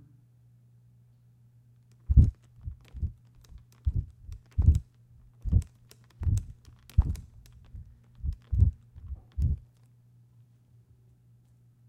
Fan popper
object, fan, air